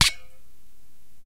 vacuum flask - twisting cap 02
Twisting the plastic cap of a metal vacuum flask.
vacuum-flask, twist, metal, hollow, squeak, container, squeaking, plastic